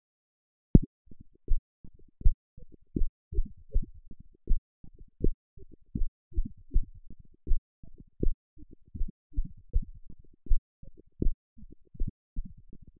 Experimental loop filtered and prcessed, for a vinga scifisound
80bpm, drumloop, electro, electronic, experimental, glitch, loop, noise, percussion, processed